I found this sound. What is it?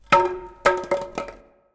Me dropping a wooden batten on my driveway at various heights. I did it around 21:30 so there would be no traffic or bird noises etc. Nice clean sound.
If not, that's fine 😊
The more the merrier. Thanks